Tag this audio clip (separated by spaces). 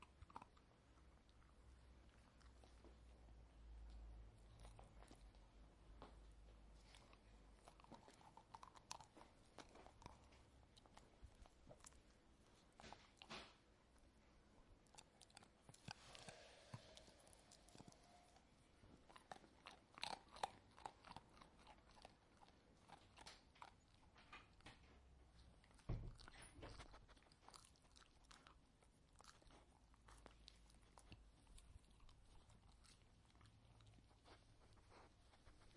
dog eating chew animal